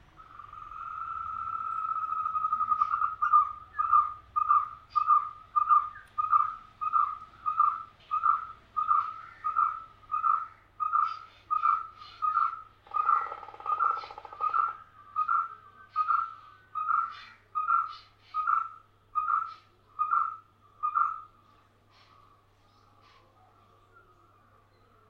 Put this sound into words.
sound of birds in my backyard